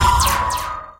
STAB 035 mastered 16 bit
A short electronic spacy effect lasting exactly 1 second. Created with Metaphysical Function from Native
Instruments. Further edited using Cubase SX and mastered using Wavelab.
effect, electronic, spacey